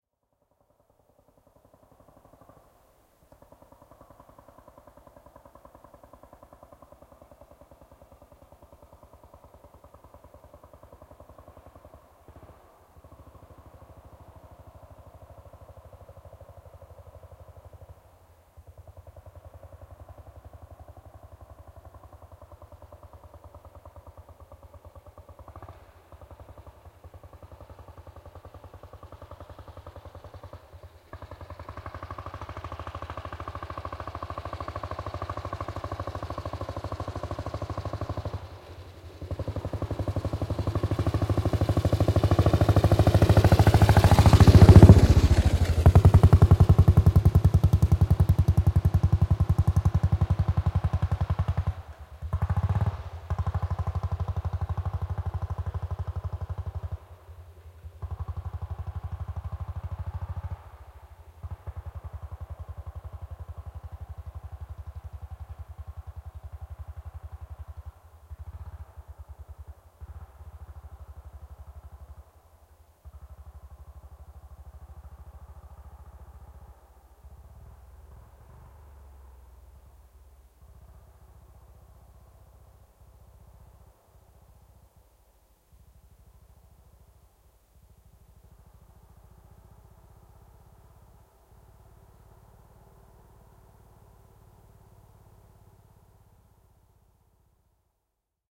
AJS M 350 cm3, vm 1952. Lähestyy metsäpolulla, ajaa hitaasti ohi ja etääntyy. Pakoputki pärisee.
Paikka/Place: Suomi / Finland / Kitee / Kesälahti
Aika/Date: 19.07.1982